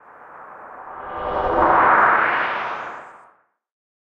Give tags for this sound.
abstract
white
synth
ghost
spectral

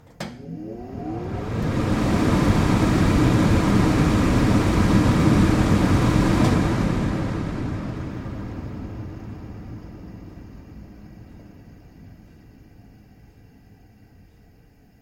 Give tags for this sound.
416
Busman
DR-680
exhaust
fan
kitchen
Mod
sennheiser
stove
Tascam

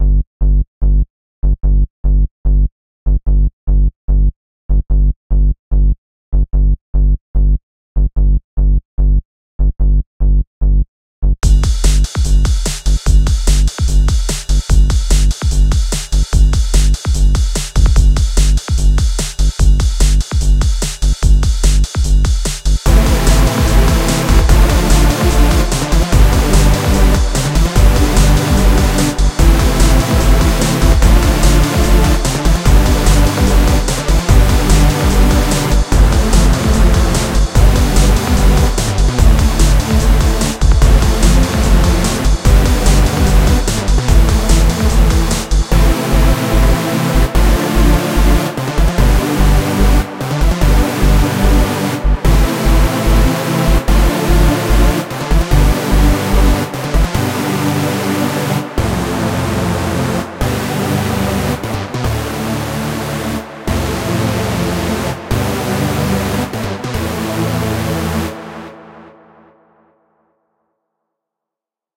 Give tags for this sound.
loop
electro
electronic
bass
synth
techno

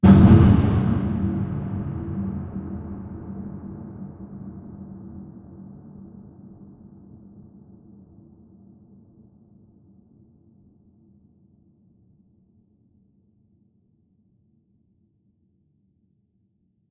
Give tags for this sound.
hit
reverb
bass
field
noise
movie
fx
deep

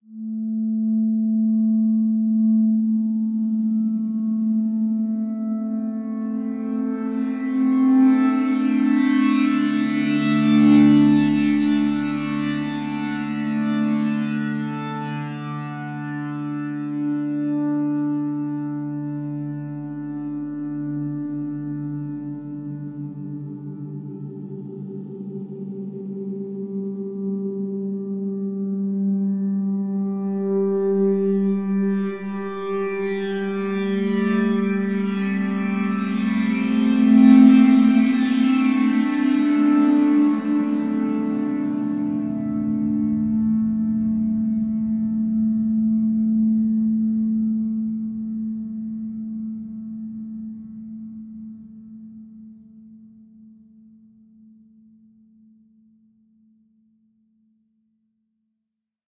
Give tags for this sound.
drone
ambient
pad
evolving
metasynth
soundscape
artificial

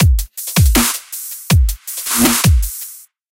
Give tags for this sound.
loop
beat
kick
160
quality
bpm
Skrillex
bits
drum
24
8
punchy
hard
dubstep
snare

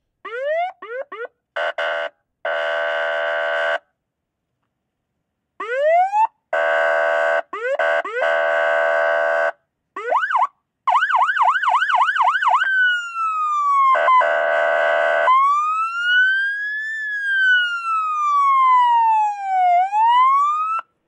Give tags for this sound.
cruiser
emergency
Police
wailer

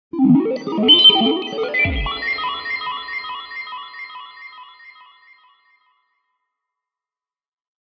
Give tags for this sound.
2K
2K-Year
Digital
Technology
Programs
Y2K
Computers
Programming

